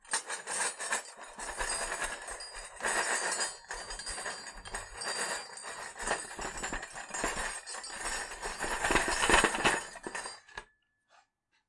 The sound of silverware rattling on a table.
Ghost, Haunted, Silverware